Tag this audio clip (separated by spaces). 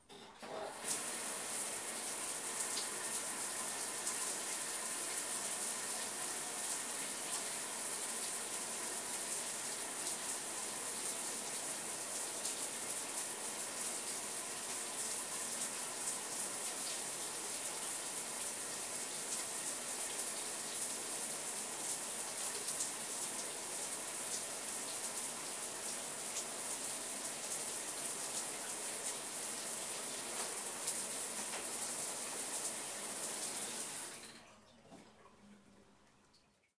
bathroom faucet off shower water